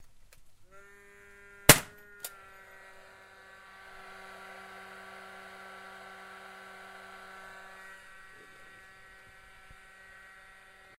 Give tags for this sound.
click
gun
motor
nail
shooting
shot

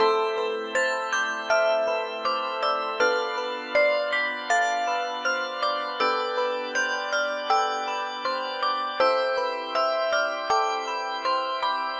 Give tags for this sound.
160bpm
4bar
80bpm
bells
electronic
glock
loop
music
organ
peaceful
synth

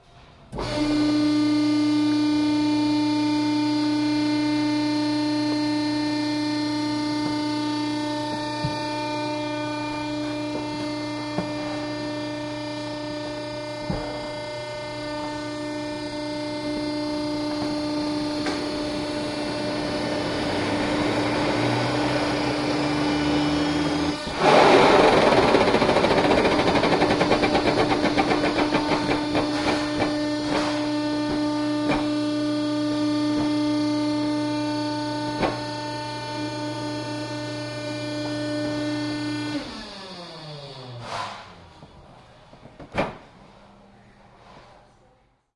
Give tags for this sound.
crush
drone
field-recording
industrial
machine

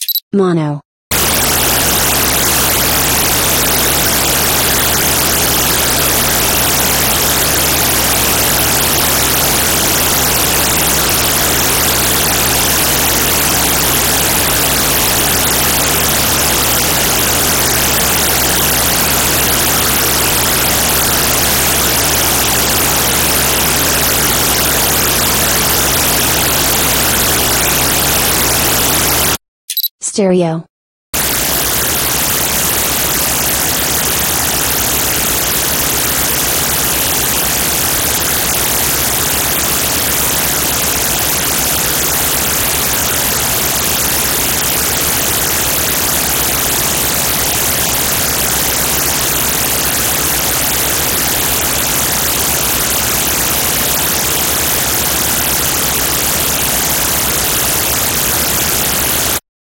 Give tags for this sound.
din
whir
roaring
uproar
roar
horror
frequency
fret
distortion
wide
sine
modulation
hubbub
ruckus
sinus

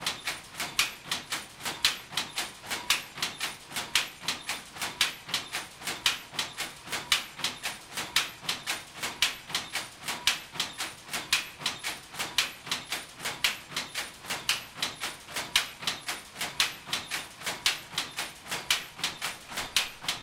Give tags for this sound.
factory
machinery
mechanical

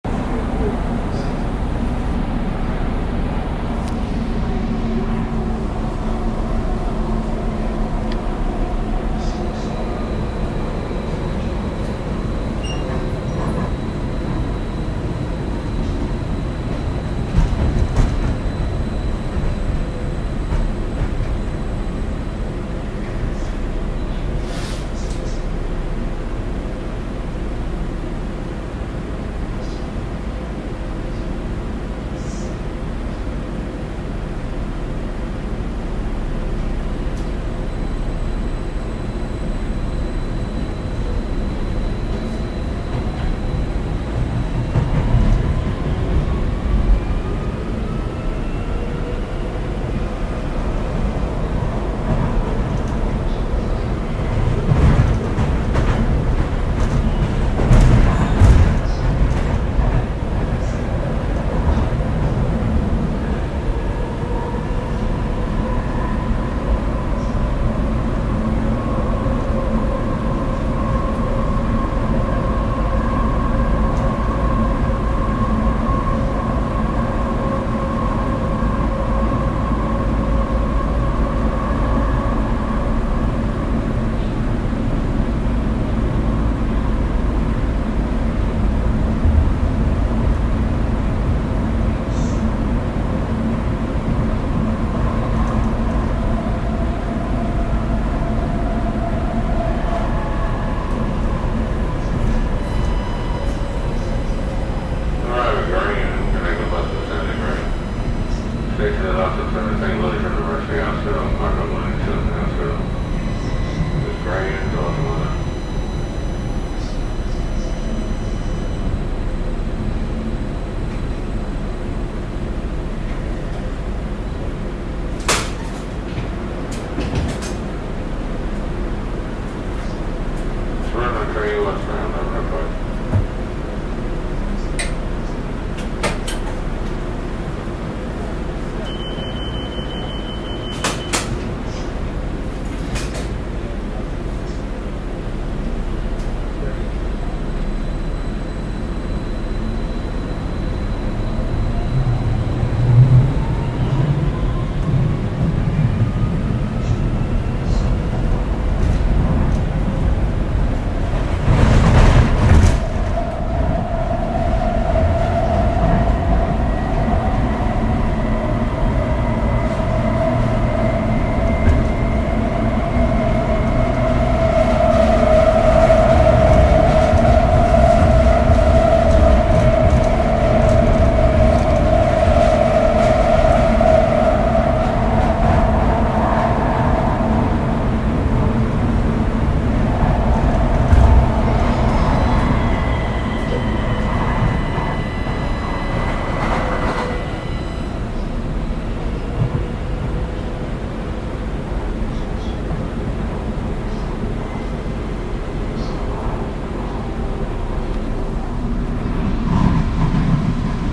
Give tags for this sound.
commute
light-rail
public
subway
train
transit

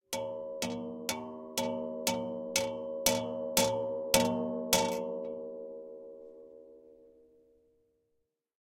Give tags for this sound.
gong
metal
hit
rhythmic
clang
metallic

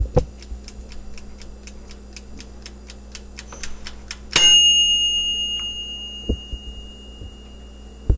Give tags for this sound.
timer
ticking
toaster

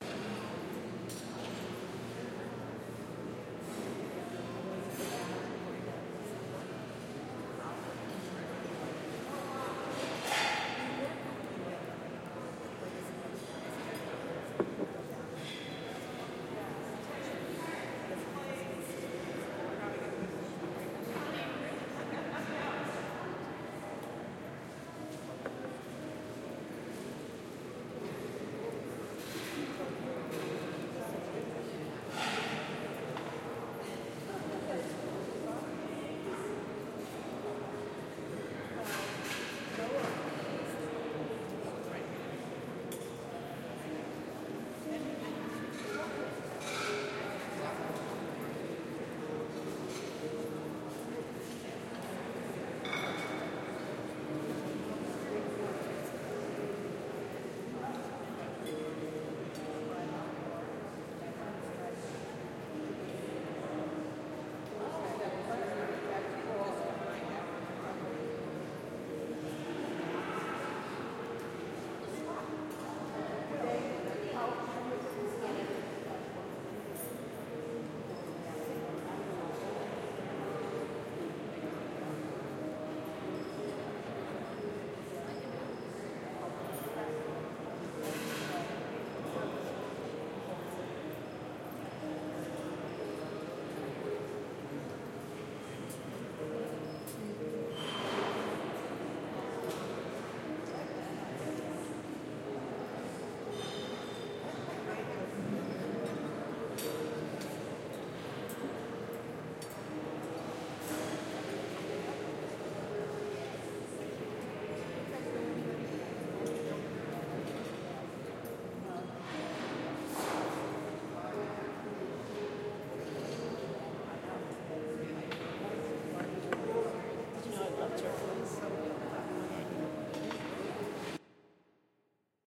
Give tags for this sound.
voices
Detroit
DIA
Art
cafe
museum
Institute

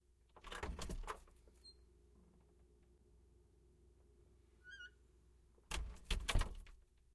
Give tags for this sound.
close
closing
creak
creaking
door
doors
open
opening
squeak
squeaky
wood
wooden

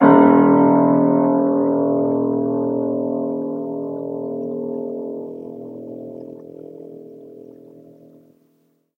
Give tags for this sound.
complete
keys
notes
old
piano
reverb
sustain

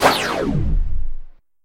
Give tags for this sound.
videogame
pew
sfx
sound

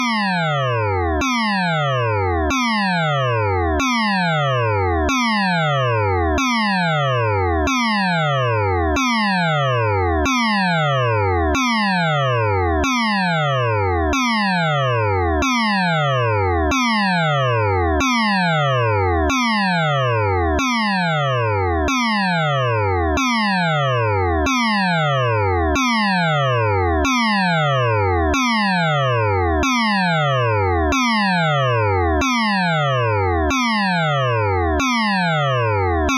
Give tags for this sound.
hawaii
federal
defense
emergency
honolulu
disaster
tsunami
Fire
civil
outdoor
alert
warning
modulator
tornado
siren
Burglar
raid
hurricane
alarm

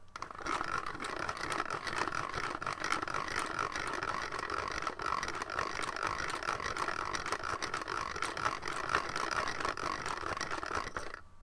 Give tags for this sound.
mechanical
pencil
sharpener
whirring

